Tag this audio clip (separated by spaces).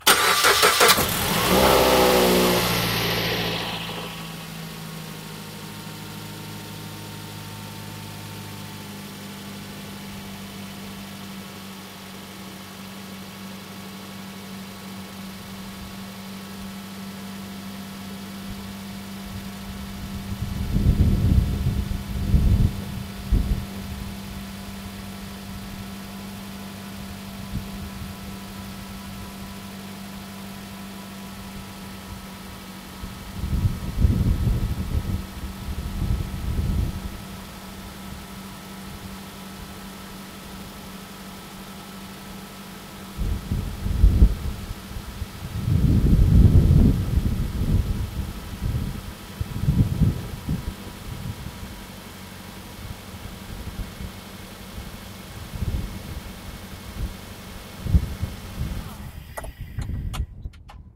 automobile drive engine motor start starting vehicle